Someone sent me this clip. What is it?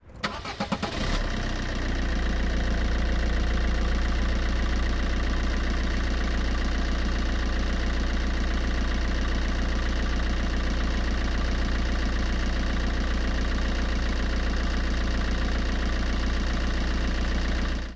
Engine On Fiat Panda 2007 External 01
2007, Engine, external, Fiat, On, Panda